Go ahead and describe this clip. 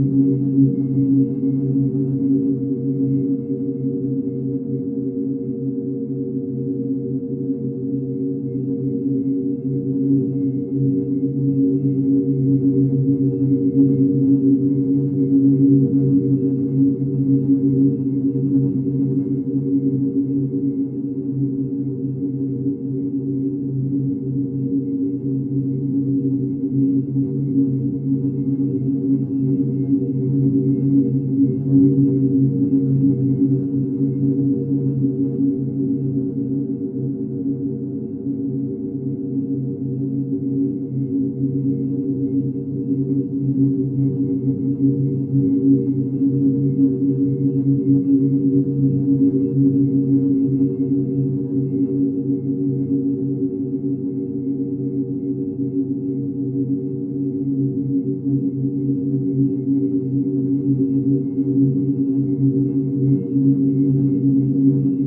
Almost Human Drone Loop
Seamless, ethereal looping drone with a male vocal tone, in the tenor - baritone range.